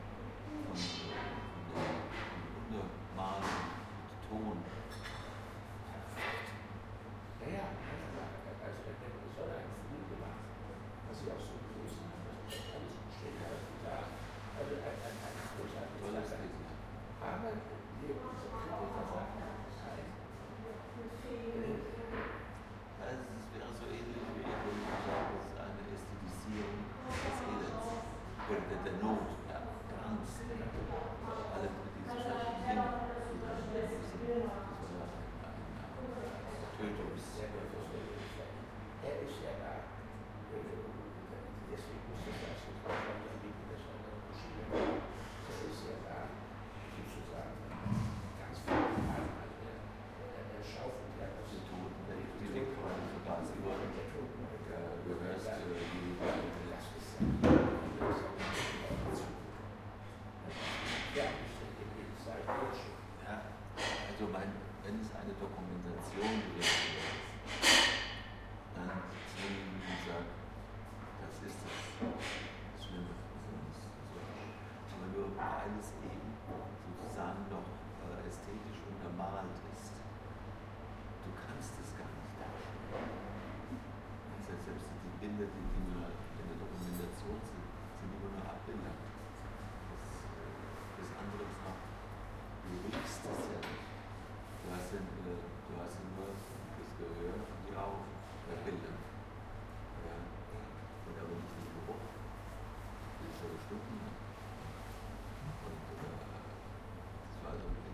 Bakery, Café
Bäckerei, Café
Boulangerie, Café
Panetteria, caffetteria
Panadería, Cafetería